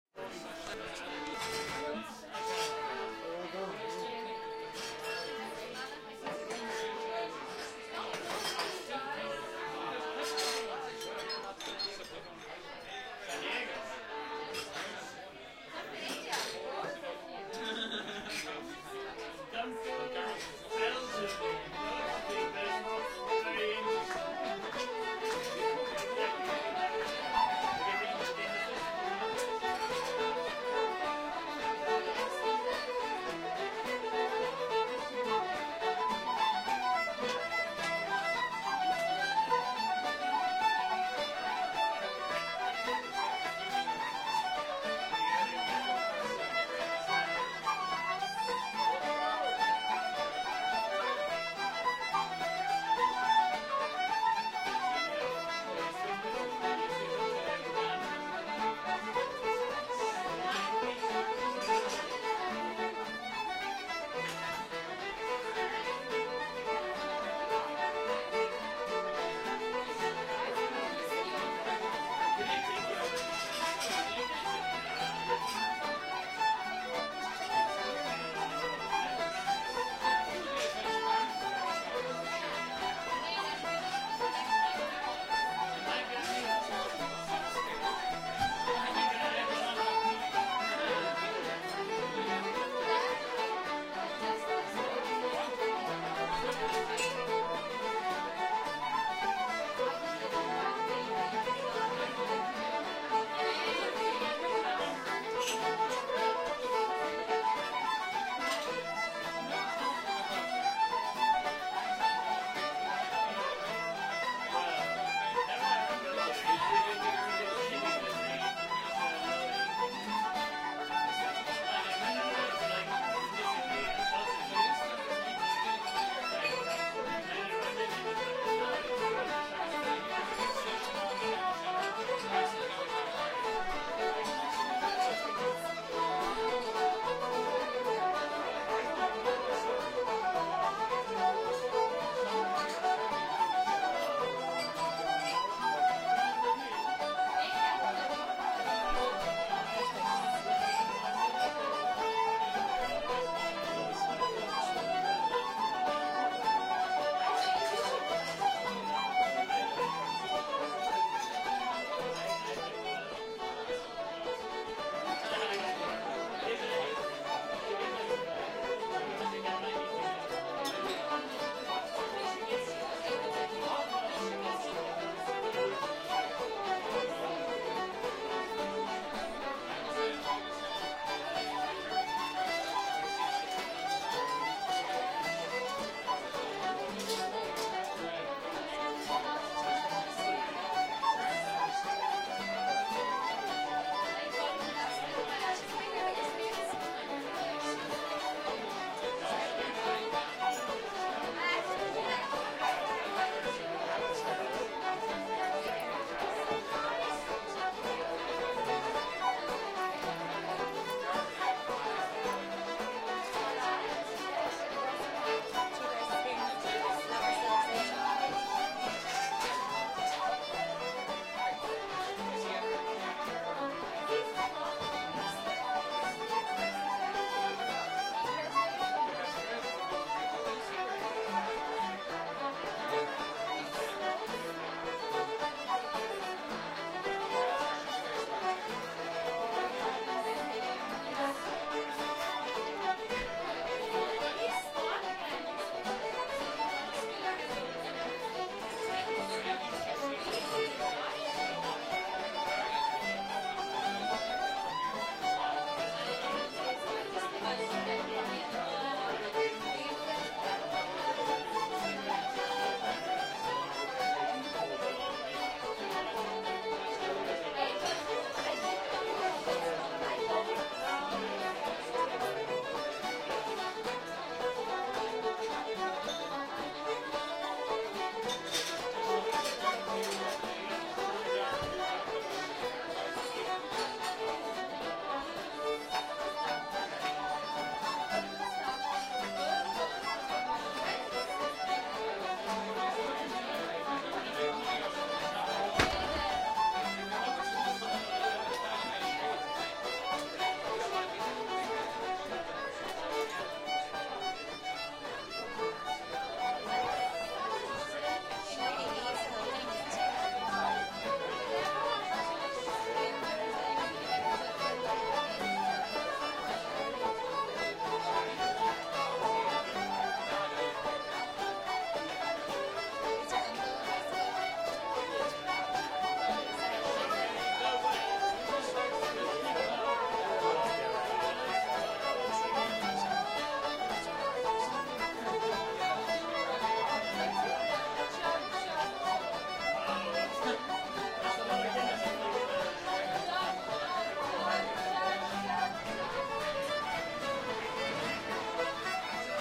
IRISH PUB the cobblestone
recording of ambience in Dublin pub the cobblestone, with traditional irish music
music, pub, tradition